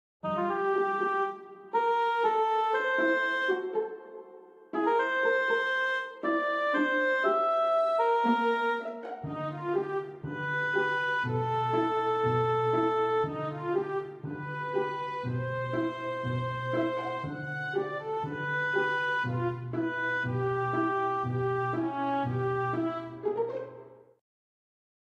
Comedy Music Theme
Short comedy show theme music.
Trivia: Originally composed for a New Zealand sitcom that was never produced around 2011.
string, short, comedy, theme, tv, fun, music, funny, flute, song, sitcom, show, humor, comedic